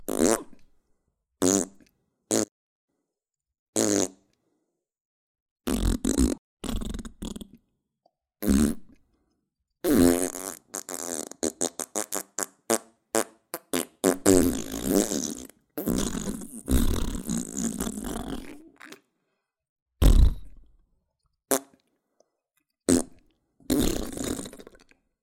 Fart Squeeze
I needed a comic sound for pressing something out of a bottle, so I did some fart noises with my mouth ;)